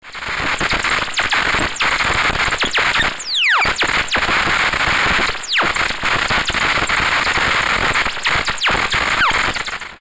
Rapid jumble of blips, beeps, white noise and static. Made on an Alesis Micron.
beeps, blips, micron, synthesizer, white-noise